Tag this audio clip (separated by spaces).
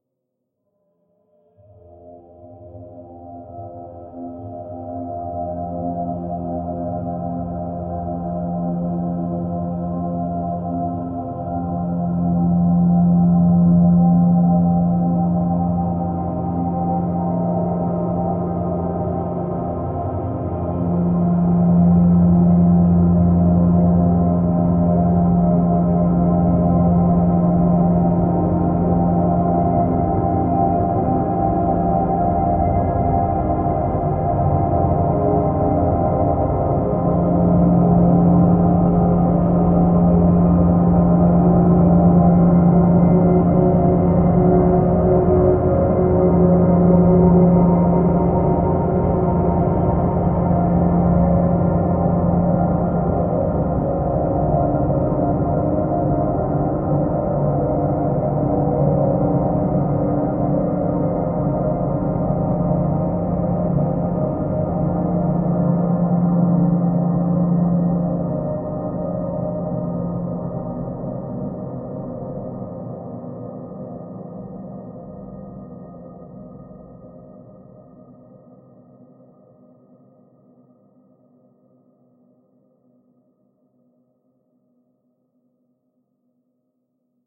ambient pad multisample artificial smooth dreamy drone soundscape evolving